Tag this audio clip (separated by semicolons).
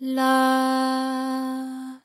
voice; female; la; singing; c3; vox